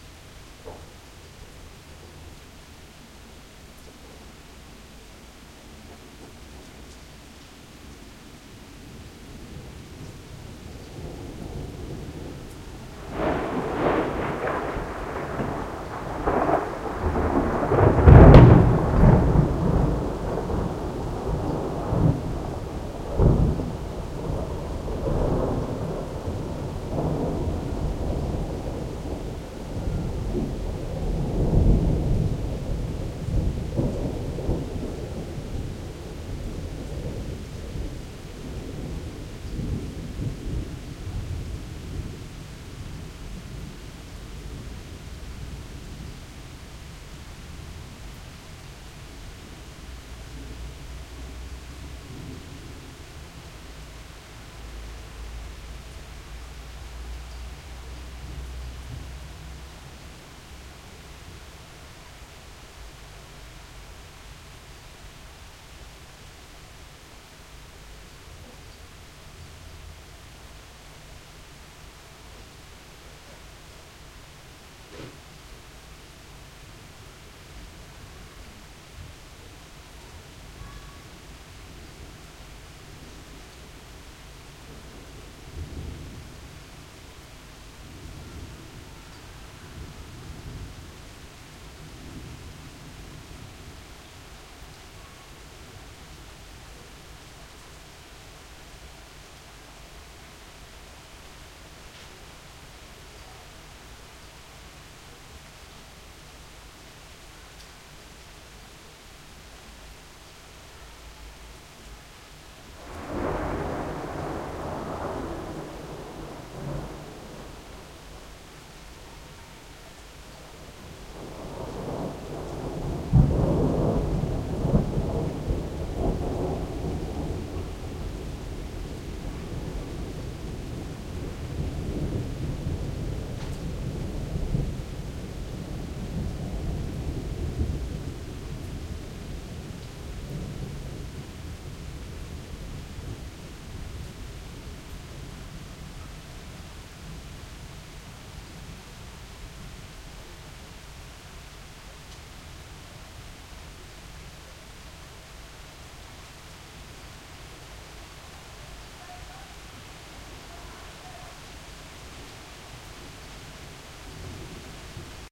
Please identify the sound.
Two of the thunderclaps during a thunderstorm that passed Amsterdam in the evening of the 16Th of July 2007. Recorded with an Edirol-cs15 mic. on my balcony plugged into an Edirol R09.
field-recording, thunderstorm, nature, thunder, rain, thunderclap